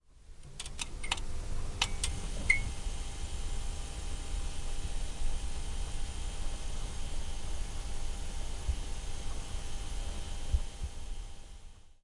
17 fluorescent lamp
Panska, Czech, Pansk, CZ